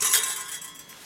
Scratch strings 7
I´ve made some sounds with the overlapping strings of my western guitar...
guitar, metal, scratch, strange